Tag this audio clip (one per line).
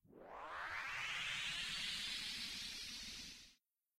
ascension
fly
flying
rising
ship
spaceship
swirl
takeoff
winding
windy